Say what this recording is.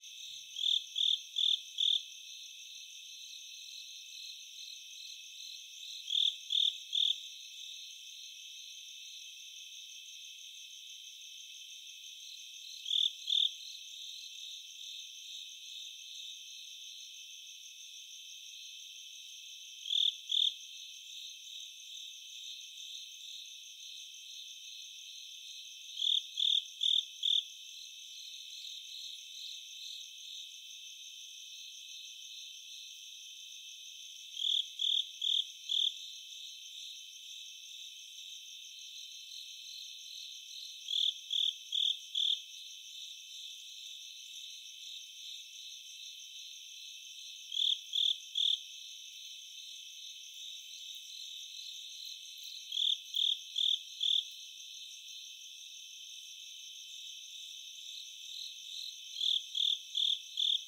Crickets Close and Distant Night
Field recording of crickets calling at night with close and distant perspectives
ambience, crickets, field-recording, forest, high-frequency, insects, jungle, nature, night, stereo